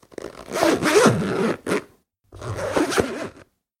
Using a zipper. Closing and opening it.
unzipping, bag, zipping, clothing, zip, undress, clothes, pants, close, zipper, coat, open, unzip, luggage, jacket, backpack